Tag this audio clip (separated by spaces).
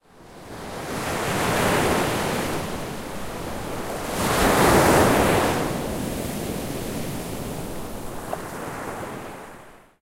edited ocean-sounds